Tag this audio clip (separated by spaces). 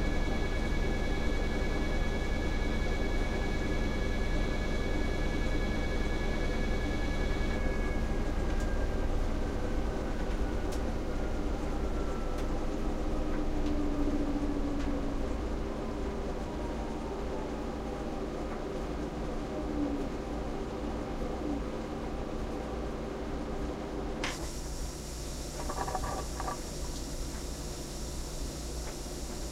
mechanical washing machine sounds